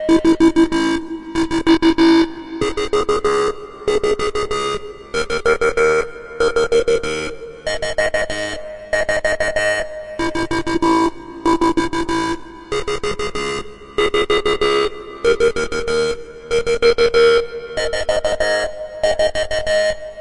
And very moving grovin bassline i made using ableton live